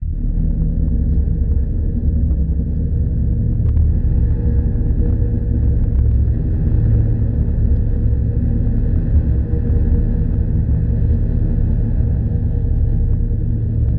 Station Under Soundscape SFX Scary 200731 0025 01
Station Under Soundscape SFX Scary
Recorded Tascam DR-05X
Edited: Adobe + FXs + Mastered
Atmosphere, Cinematic, Dark, Scary, Station